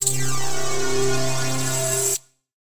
Tweaked percussion and cymbal sounds combined with synths and effects.
Drill, SciFi, Noise, Laser, Beam, Phaser, Space, Futuristic, Effect